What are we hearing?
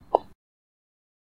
stone footstep 1
Footsteps on stone recorded with a Zoom Recorder
stone, steps, step